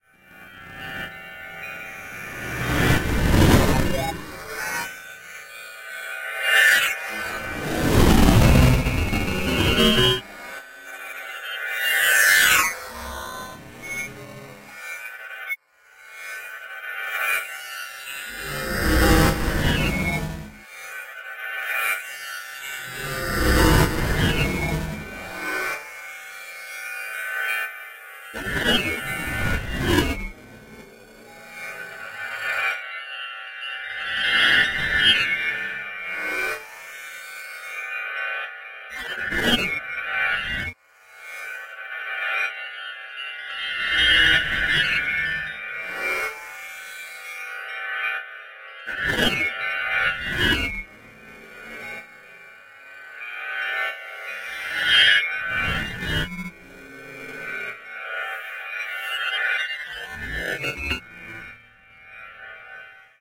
Bells echoing in the dark recesses of a sticky ear cavern.